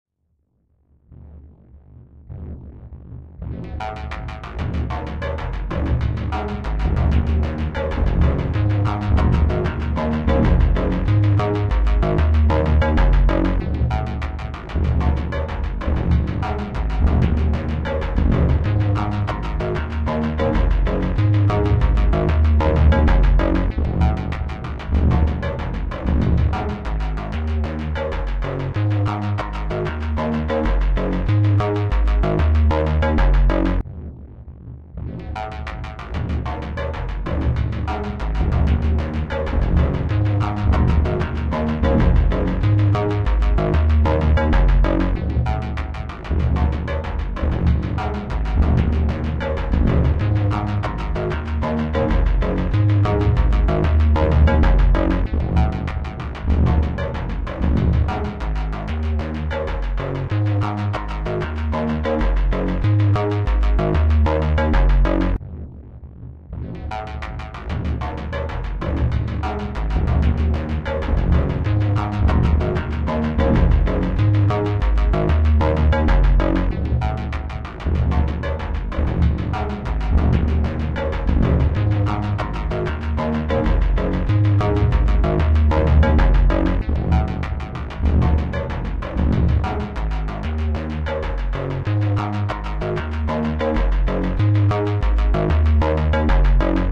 There's a Japanese kodo drum added in there somewhere too.